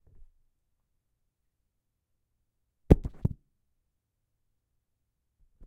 A samsung phone with a leather cover is dropped onto a table from the height of , approximately, 30 cm. The microphone is on the table to catch the impact of the sound.